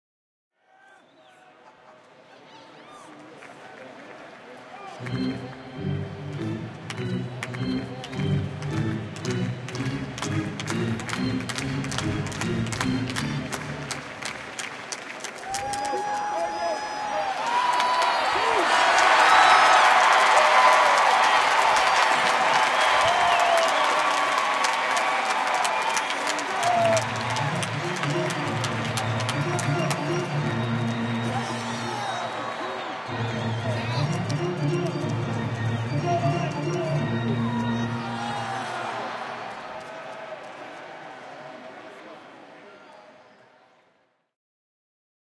WALLA Ballpark Organ Cheering Charge
This was recorded at the Rangers Ballpark in Arlington on the ZOOM H2. The organ playing the charge song.
ballpark, baseball, charge, crowd, field-recording, music, organ, sports, walla